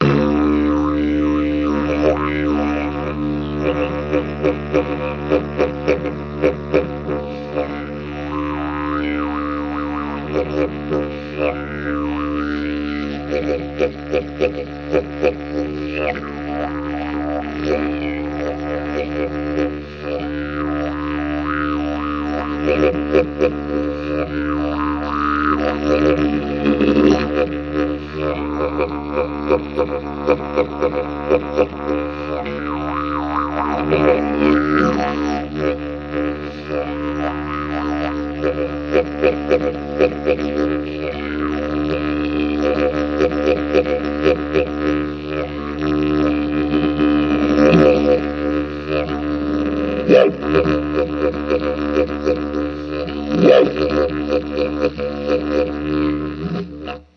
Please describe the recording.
This is me on my didgeridoo.